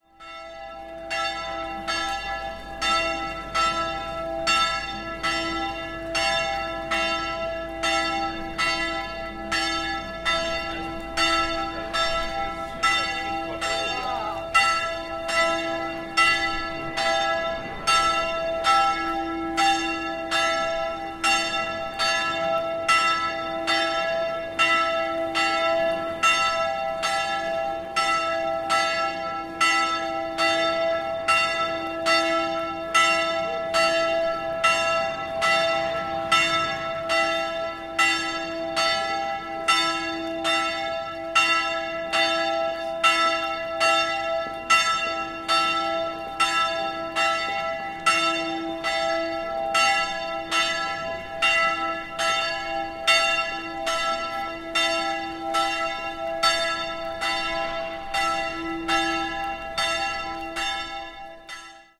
120122 noon bell Antoniterkirche
Chiming bell of the Antoniter church in the center of Cologne at noon on a rainy sunday.Zoom H4n
Have a look at the location at
bell, cologne, field-recording, church